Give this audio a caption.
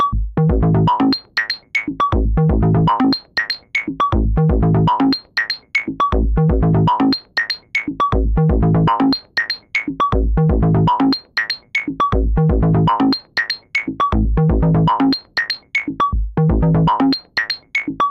Some recordings using my modular synth (with Mungo W0 in the core)
Analog, Mongo, W0